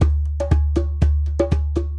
Djembe Loop 01 - 135 BPM
A djembe loop recorded with the sm57 microphone.
tribal, drum